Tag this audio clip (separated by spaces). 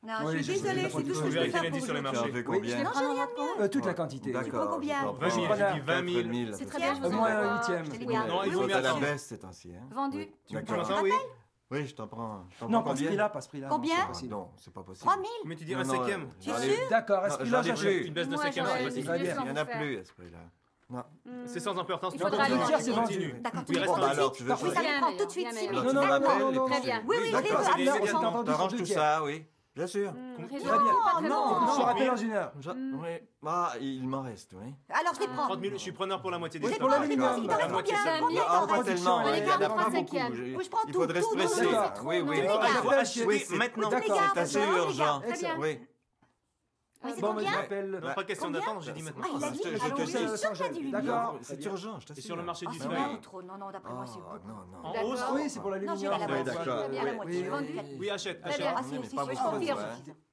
vocal-ambiences localization-assets interior stock-exchange